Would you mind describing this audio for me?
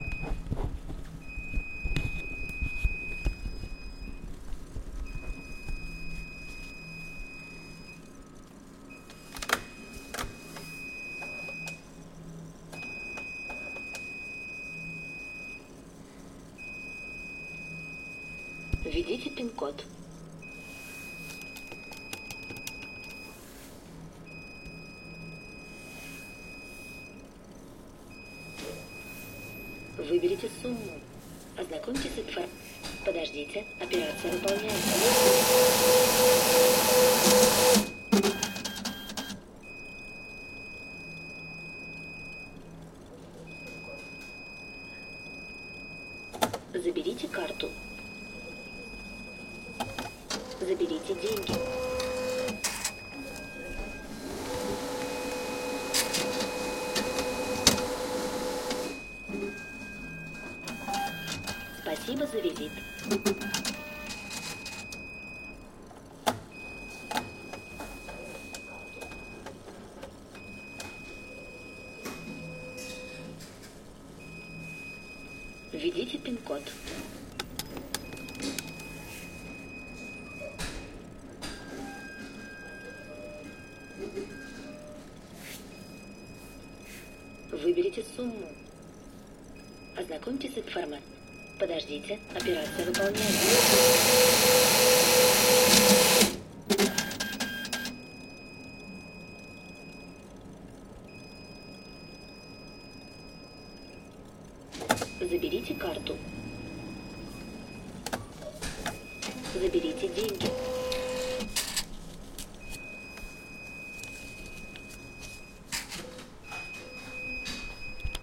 russian atm usage